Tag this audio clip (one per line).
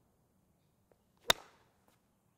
golf
small-hollow
small-ball
hitting-a-golf-ball
golf-club
golf-ball-hit
golfing
clup
hollow
small-object-hit
striking-a-small-object